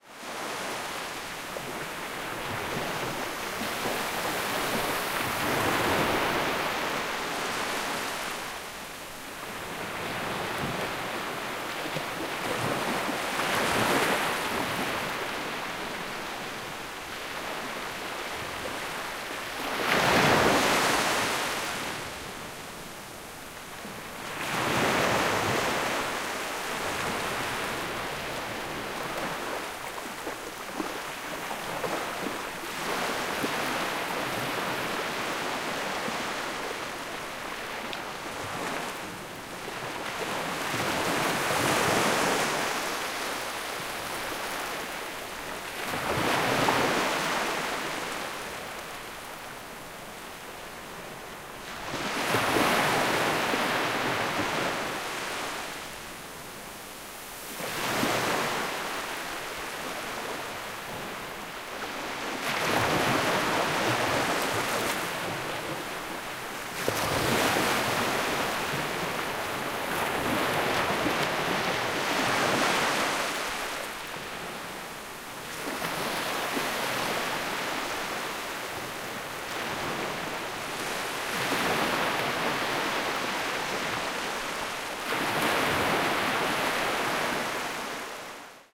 Gentle ocean waves fizzing bubbles 2
Calm waves crashing on a beach with the sound of fizzing bubbles as they break to an end. Recorded in Walton-on-the-Naze, Essex, UK. Recorded with a Zoom H5 MSH-6 stereo mic on a calm winter evening.
beach, bubbles, calm, Essex, fizzing, msh6, north-sea, ocean, ocean-ambient, ocean-waves, sea-bubbles, wave-bubbles, waves, winter